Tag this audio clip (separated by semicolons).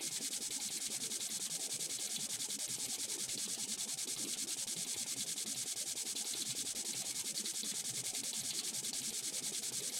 Agua; Loop; Pressure; Regador-Automatico; SFX; Sprinkler; Water